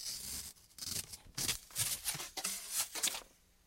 Metal scrape and slide
cloth, object, metal, fabric, swish